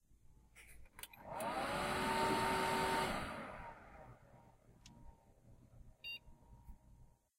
swithon-pc
The sound of switch on a pc whit the fan noise and the typical "beep".
pc, campus-upf, switch-on, fan, UPF-CS13